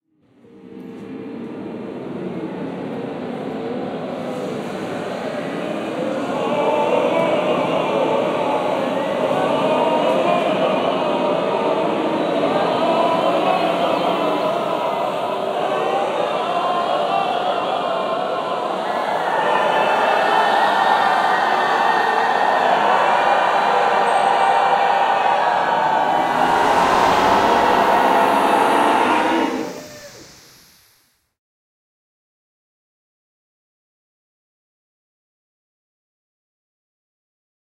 Sounds a bit like 2001?
Namely:
and also one of my own:
chorus, effect, human, sci-fi, soundeffect